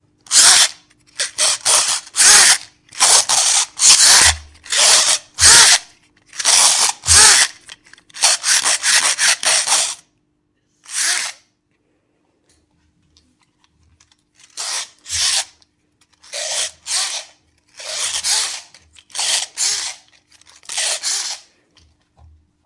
09 Wind Up Boat
This is a recording of a a girl winding up a toy boat. It was recorded at home using a Studio Projects C1.